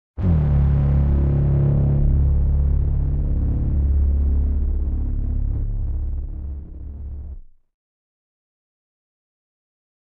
Cherno Alpha Final
rim, Jaeger, Alpha, Cherno, pacific, distortion, Horn